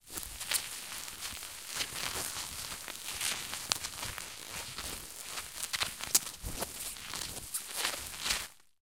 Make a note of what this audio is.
plastic - bubble wrap - handling 01
squeezing and handling a wad of bubble wrap.